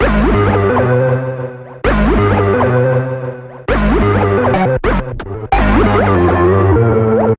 Back Turned